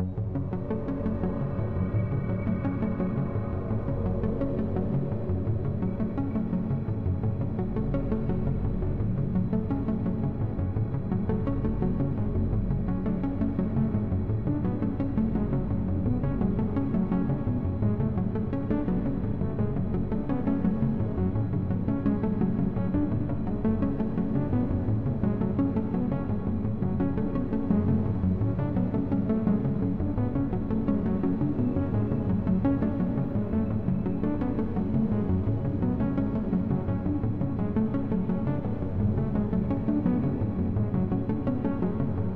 stk2 space orc atmo
ambient, atmo, atmosphere, sci-fi, space, synth